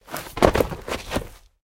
BODY FALL - V HVY - DIRT
Heavy body fall, followed by feet hitting ground. Dirt surface.
body, dirt, drop, fall, grave, ground, hit, impact, land, stumble, thud, trip, tumble